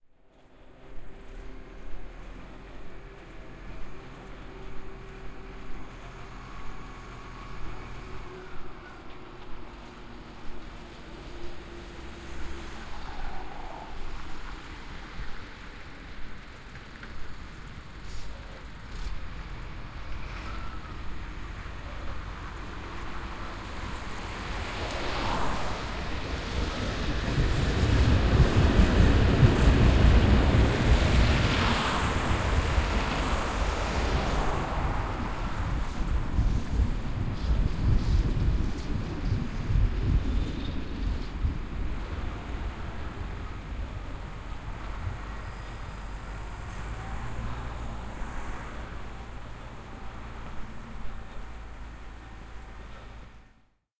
Moscow tram passing by
Moscow winter street ambience, train honking afar, cars, then tram passing by
Binaural, recorded with Tascam DR-05, Roland CS-10EM binaural microphones/earphones.
ambience, binaural, cars, city, field-recording, Moscow, noise, street, streetcar, town, traffic, tram, transport, urban, winter